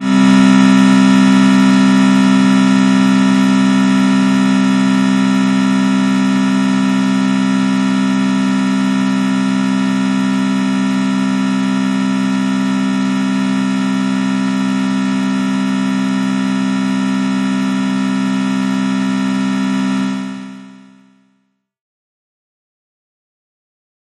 Sleep Study - Volume Test
This is a volume level testing component for a sleep study.